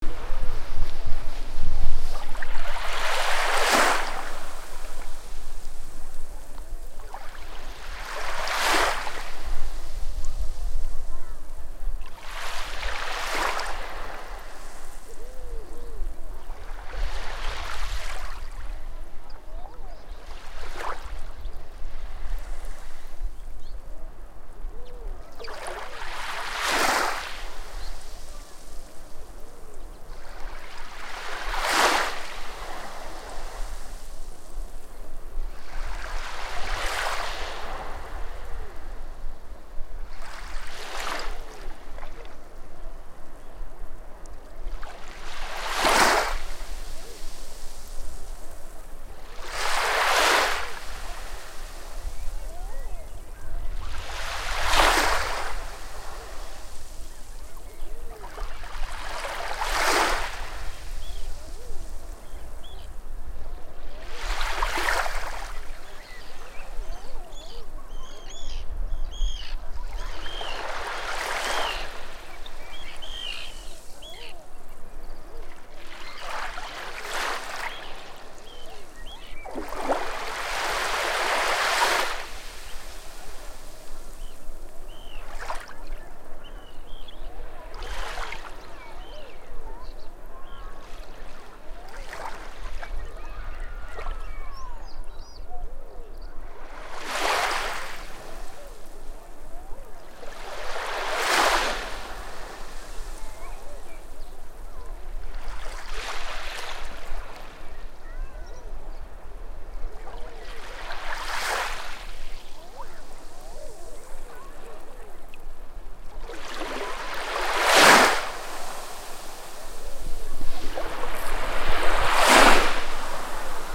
West Voe beach 2
The sea at West Voe Beach in Shetland, recorded in May 2019.